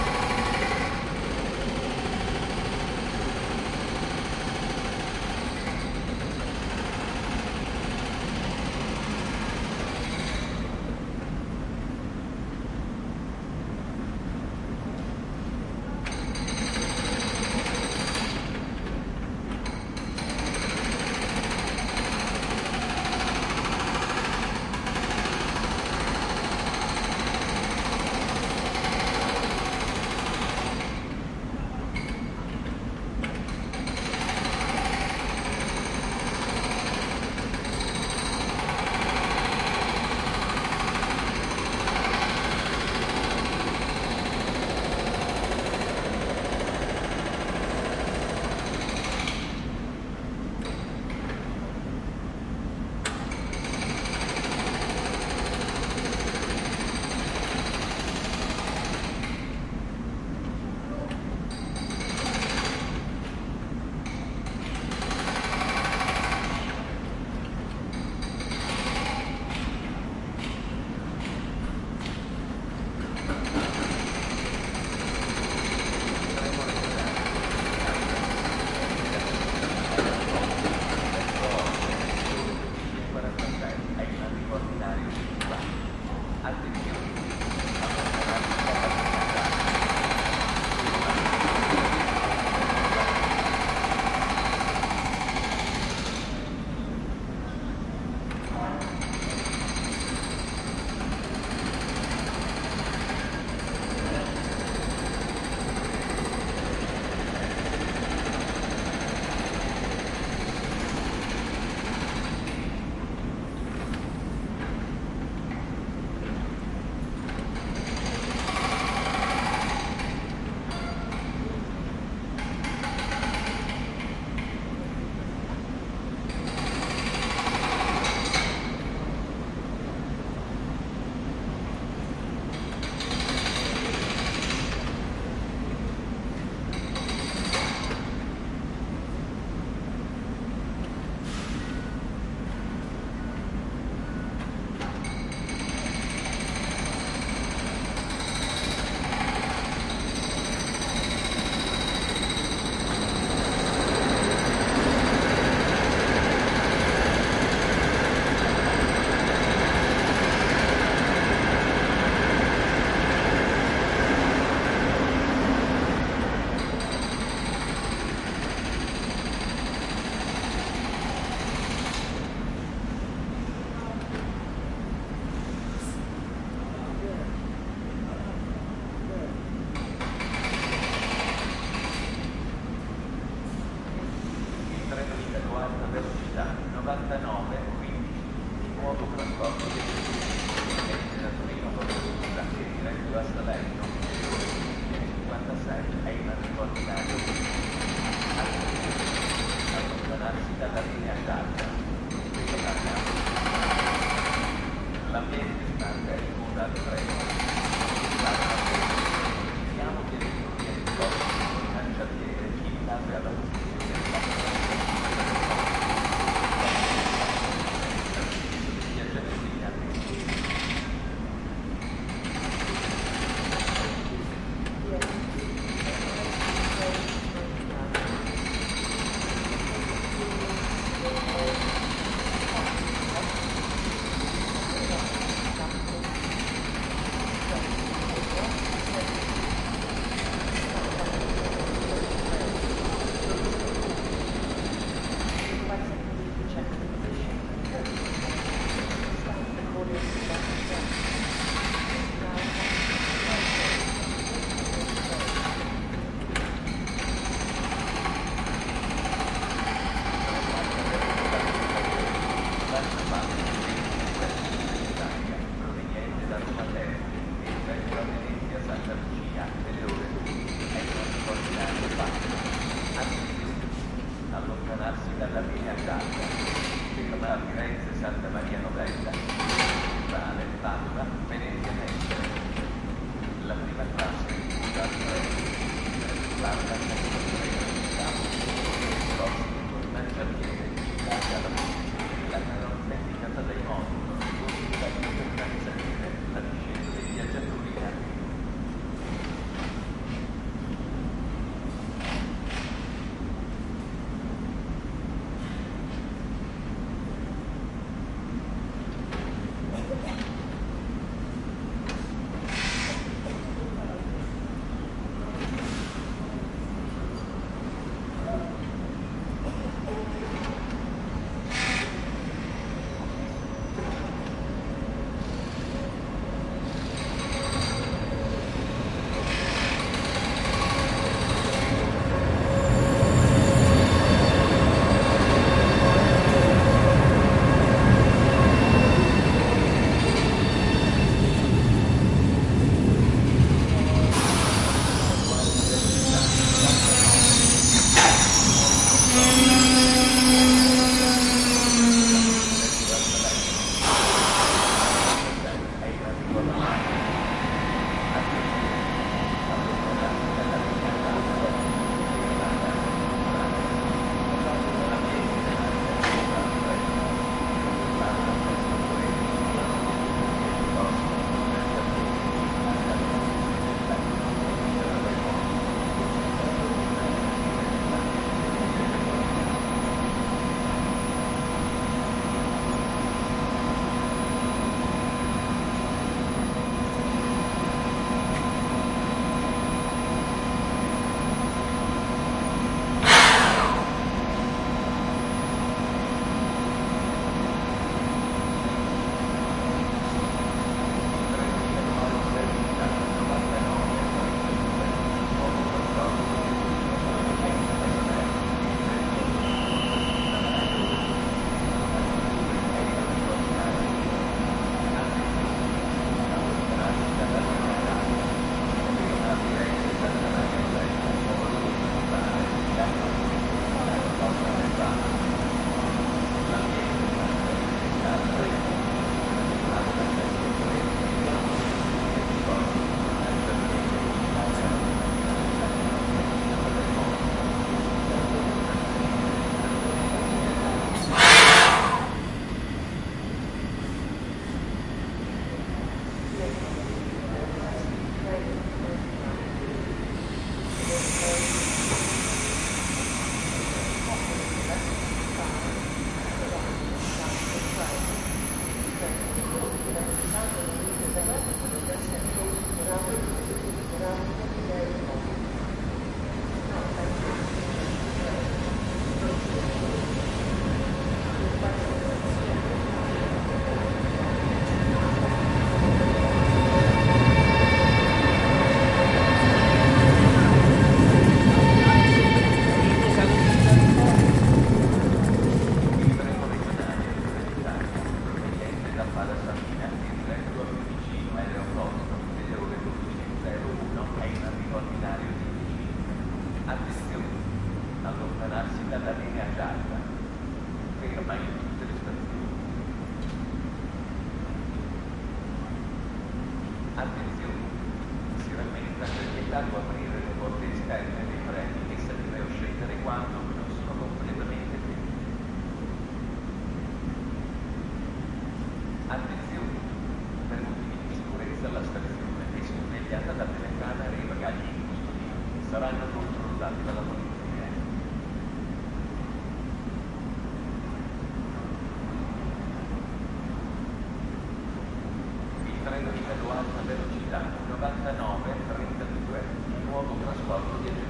Work in Progress- Train Station
I've recorded some men at work in the train station of Tiburtina, Rome.
From minute 5:30 to 8:10 is recorded a train coming, stopping and leaving the station
departures, work, train, announcement, progress, station